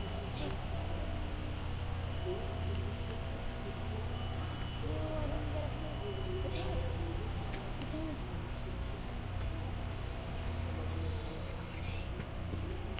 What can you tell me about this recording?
Our school is building a swimming and sports complex. Here are our recordings from the building site.
BuildingSite, TCR